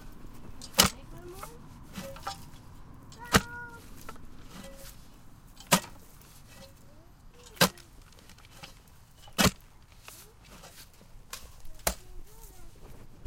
post hole digging
Digging holes with a post-hole digger.
clapping, post-hole-digger, tools